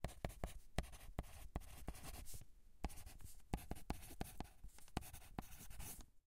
writing - pencil - wide 03
Writing with a pencil across the stereo field, from right to left.
Recorded with a Tascam DR-40, in the A-B microphone position.
rustle, graphite, scribble, right-to-left, paper